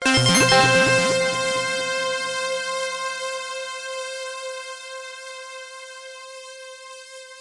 Regular Game Sounds 1
You may use these sounds freely if
you think they're usefull.
I made them in Nanostudio with the Eden's synths
mostly one instrument (the Eden) multiple notes some effect
(hall i believe) sometimes and here and then multi
intstruments.
(they are very easy to make in nanostudio (=Freeware!))
I edited the mixdown afterwards with oceanaudio,
used a normalise effect for maximum DB.
If you want to use them for any production or whatever
20-02-2014
effect, sound